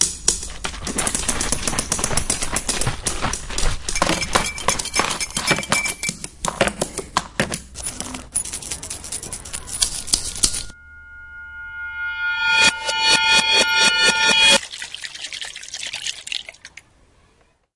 soundScape SASP 6thgrade
Spanish students ( from Santa Anna school, Barcelona ) used MySounds from Belgian students (from the Wijze Boom school and the Toverberg school, both in Ghent) to create this composition. Hope you enjoy.
soundscape, cityrings, spain, santa-anna